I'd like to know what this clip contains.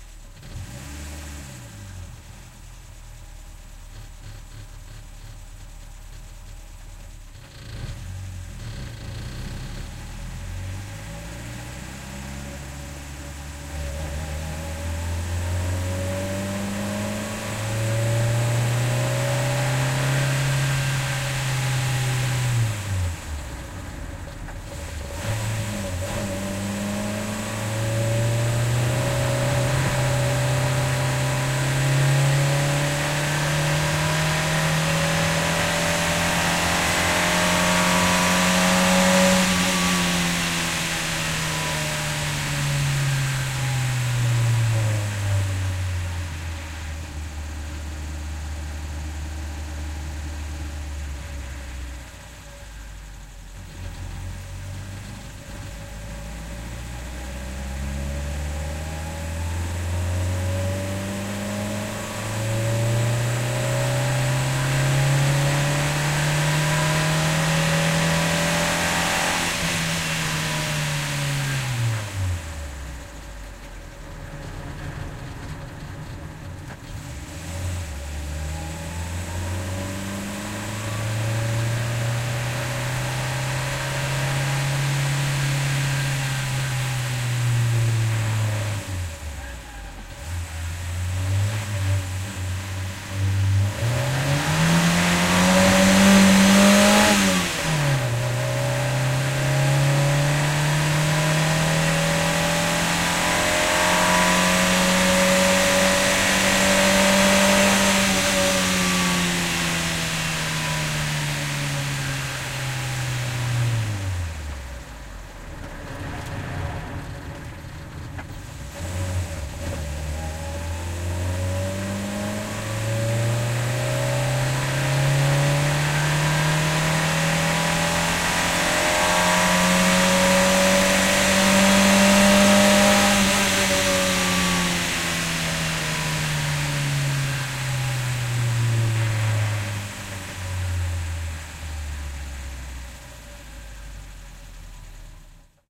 Reault 19 driving 2
Additon to the other recording, contains start / stop / idle and some more acceleration through gears and coast down to idle.
19, Renault, driving, hard, revs